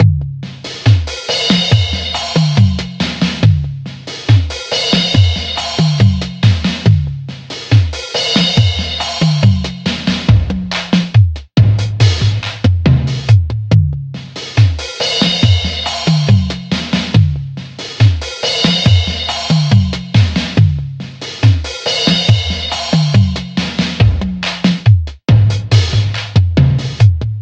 75 bpm Acoustic Distortied Attack loop 7
ATTACK LOOPZ 02 is a loop pack created using Waldorf Attack drum VSTi and applying various amp simulator (included with Cubase 5) effects on the loops. I used the Acoustic kit to create the loops and created 8 differently sequenced loops at 75 BPM of 8 measures 4/4 long. These loops can be used at 75 BPM, 112.5 BPM or 150 BPM and even 37.5 BPM. Other measures can also be tried out. The various effects are all quite distorted.
drumloop, 4, 75bpm